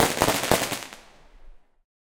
Explosion from a multi-shot firework on Nov 5 2020. Recorded both "hot" and "cold" (i.e backup recording). This is the "cold" (crisp, clear and snappy - no clipping and recorded within a sensible average gain).
A brief sight of the firework was quite a beautiful array of splashing hazy colours and heady explosions.
A part of a package of explosions I intend to release!
kaboom, explosive, sparkly, boom, bang, explosion, sfx, multi-shot, firework